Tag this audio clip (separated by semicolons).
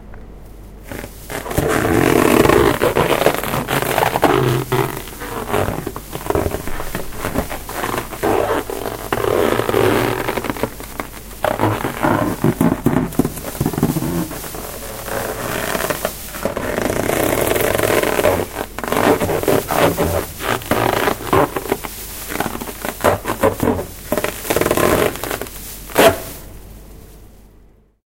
bruitage; field-recording; workshop